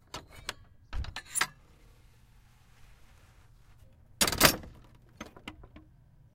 gate OpenClose
Wooden fence gate closing and latching. Recorded with DR-07X
closing, wooden, gate, close, latch, door